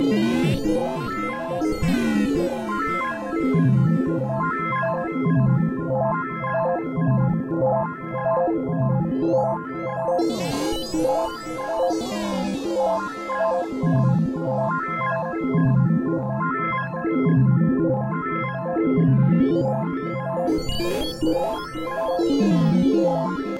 Don'tGoFX006
Radio wave interference/robot-burbling-like synth sounds recorded from a MicroKorg.
electronica, radio, noise, experimental, robot, synth, static